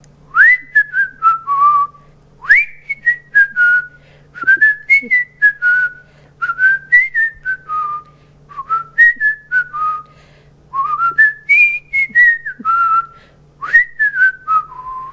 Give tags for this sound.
happy; whistle

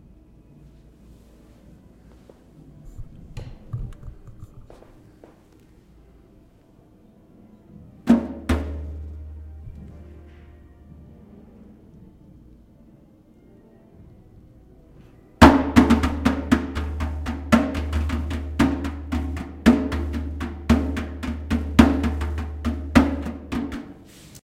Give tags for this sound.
can; MTC500-M002-s13; slam; trash

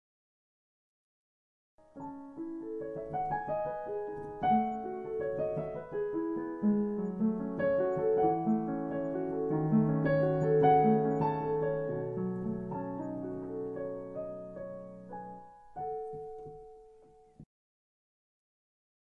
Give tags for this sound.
music; classical; piano; practicing

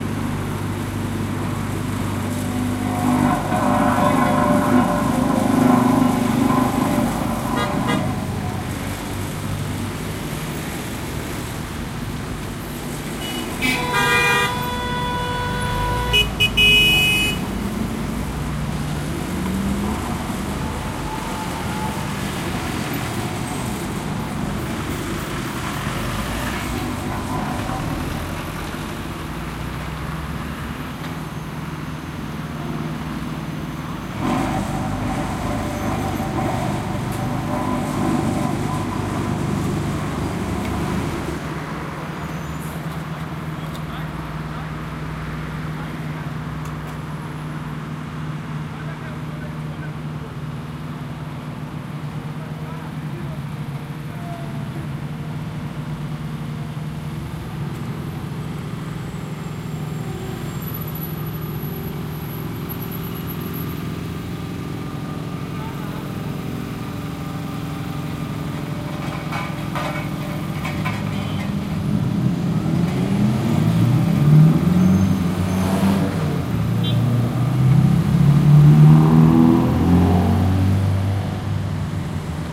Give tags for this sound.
ambience; cars; field-recording; noise; repair; street; town; traffic; working

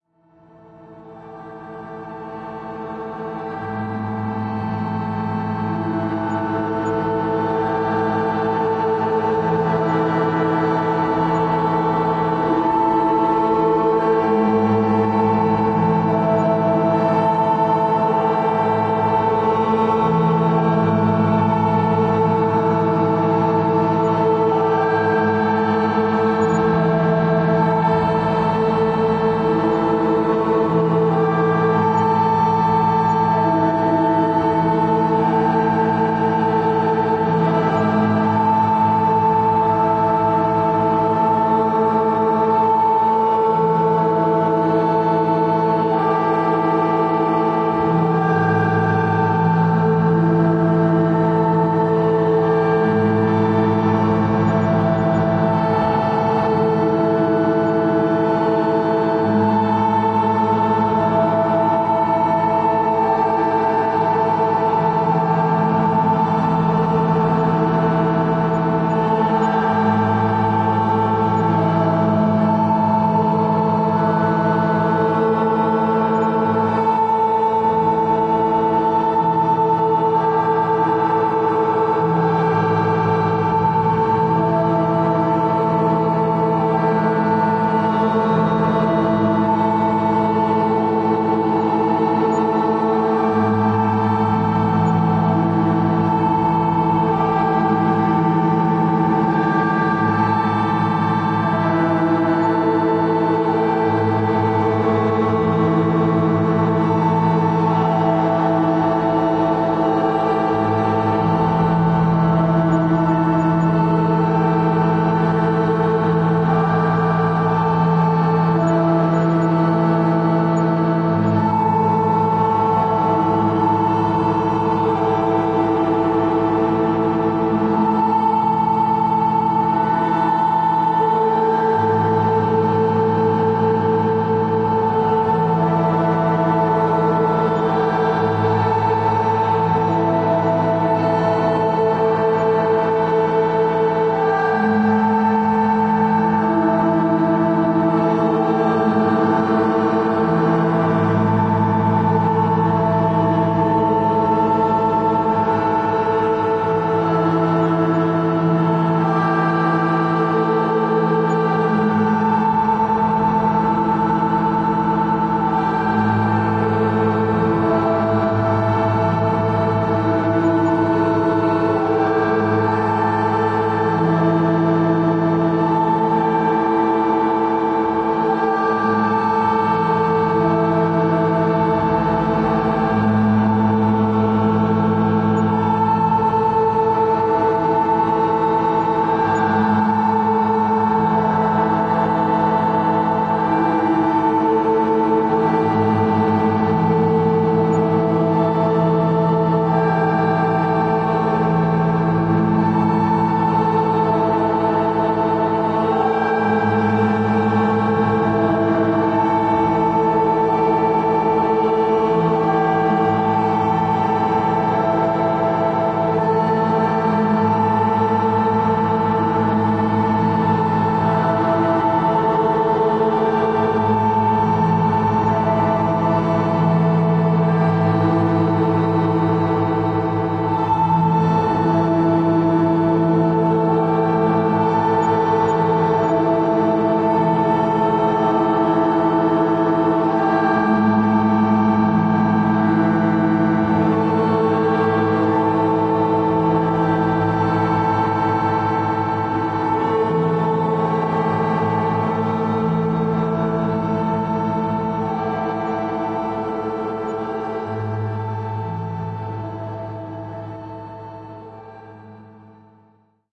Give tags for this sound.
dark; processed; atmosphere; cinematic; sci-fi; drone; silo

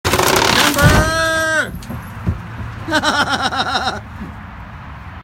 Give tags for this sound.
man; timber; laughter; laugh; laughing; chuckle; building; chuckling

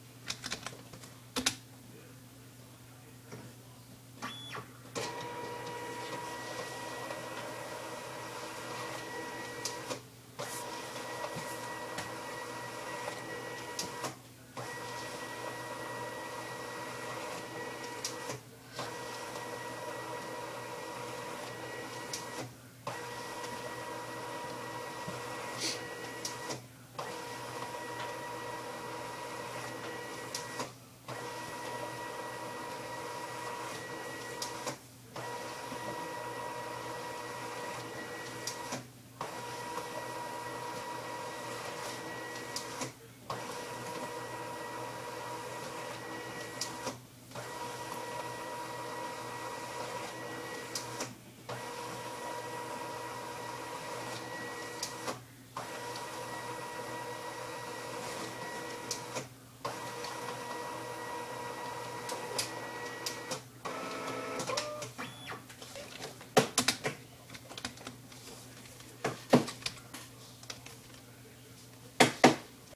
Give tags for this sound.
computer; engine; feed; hopper; keyboard; motor; office; paper; scan; scanner; technology; tray

